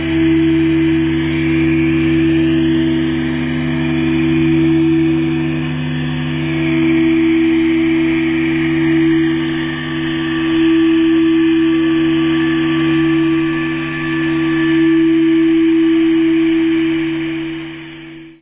I recorded myself making ringing feedback noise with my guitar through a valve amp, plus some wah.